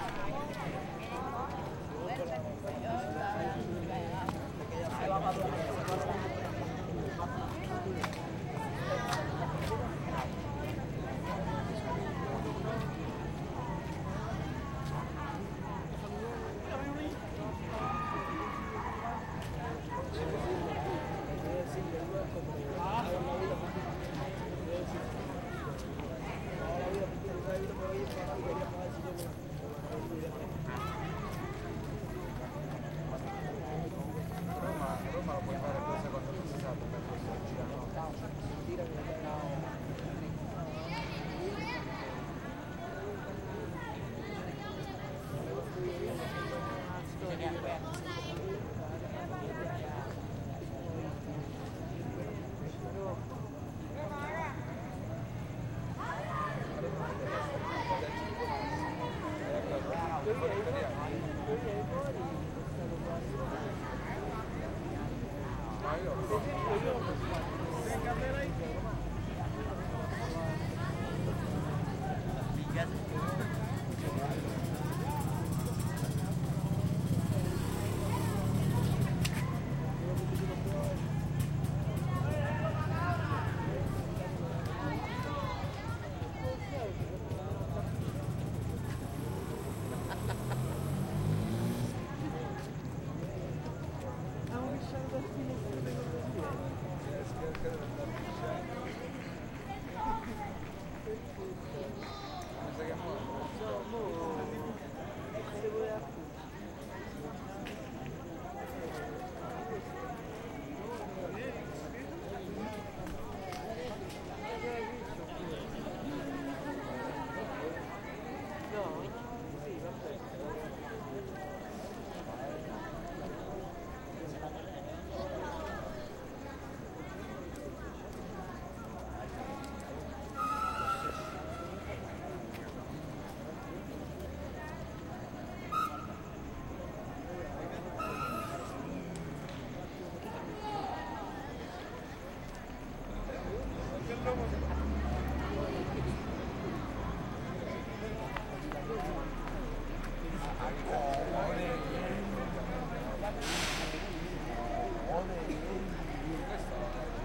park square people some close voices spanish and distant traffic hum Havana, Cuba 2008